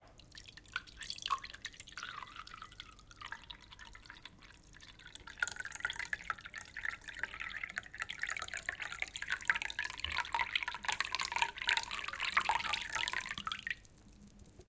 water pouring light
Light slow pouring of water into a glass filled with water.
liquid, pour, pouring, water